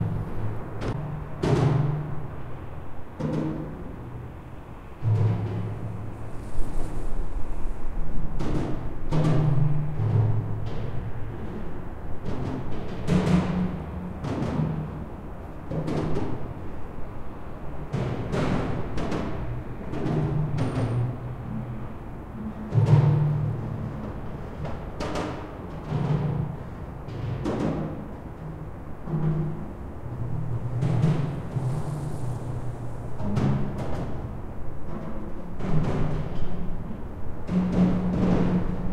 under Leningradskiy bridge5
The roar of a bridge, when the cars drive over the bridge. Left river-side.
Recorded 2012-09-29 04:15 pm.
2012, Omsk, Russia, atmo, atmosphere, bridge, cars, noise, roar, rumble